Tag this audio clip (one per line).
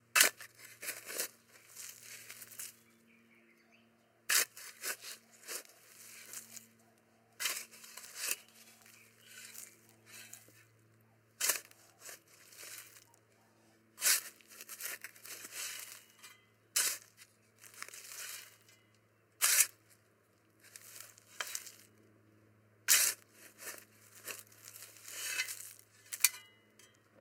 dig
digging
digging-sand
gardening
OWI
sfx
shovel
shoveling
sound-effect